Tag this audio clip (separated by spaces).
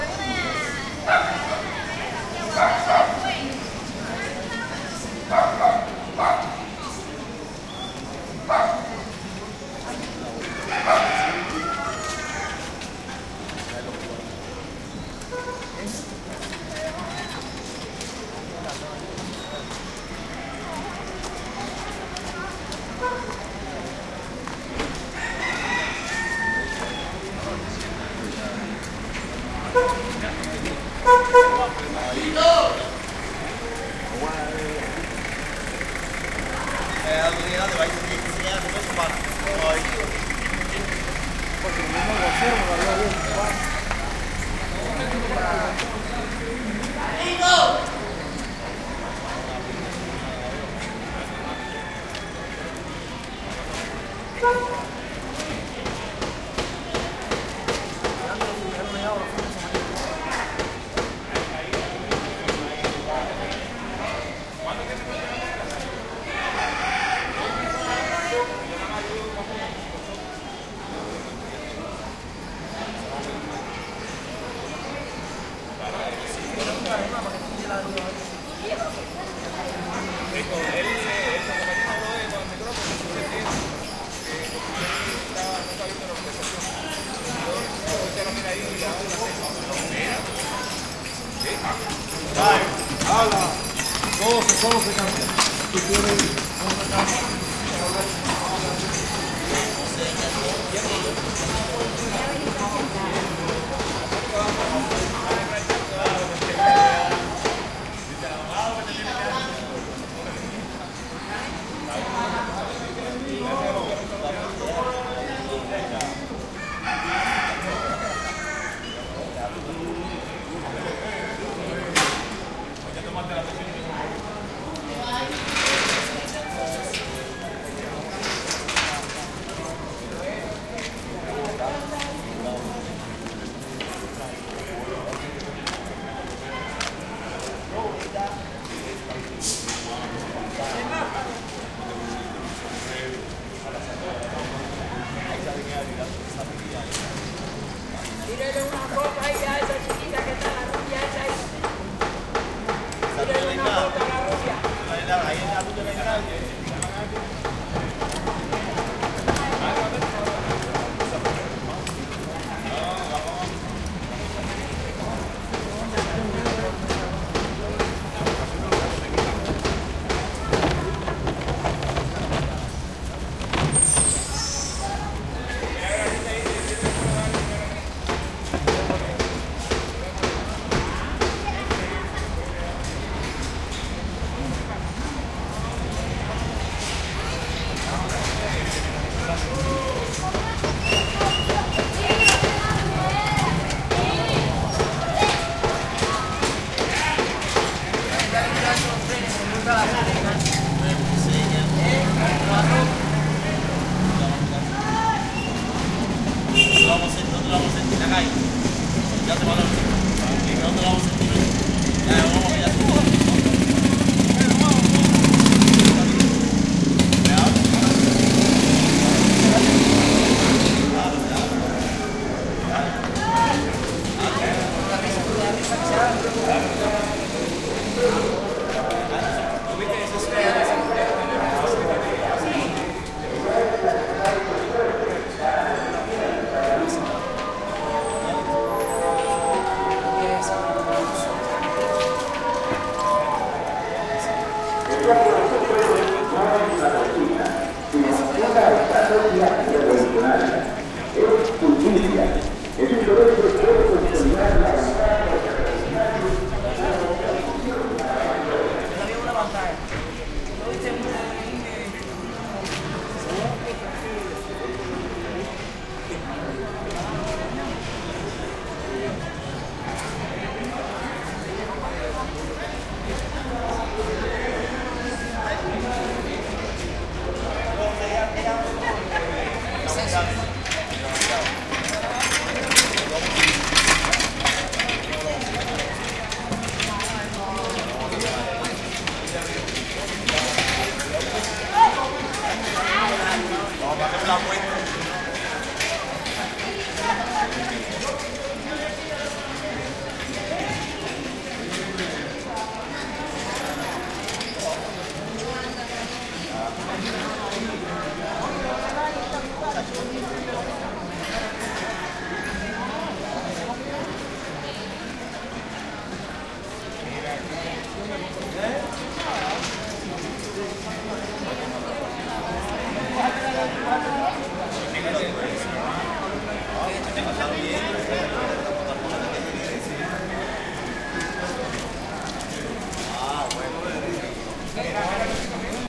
cars cuba havana old people street